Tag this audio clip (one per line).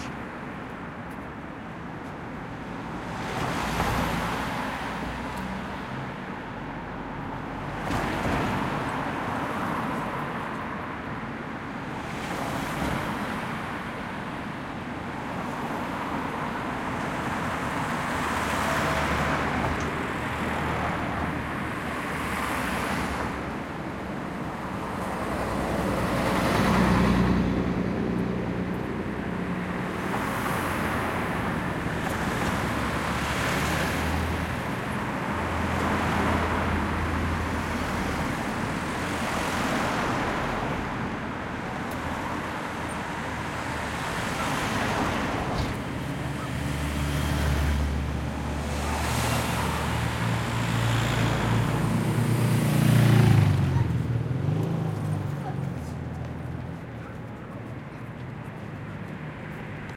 bridge
tower
doppler
traffic
london